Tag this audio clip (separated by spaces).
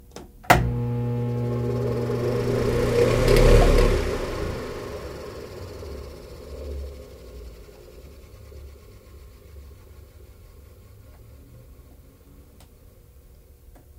bathroom fan